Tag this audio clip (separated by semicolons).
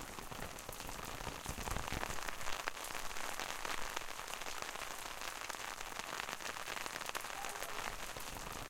atmosphere; rain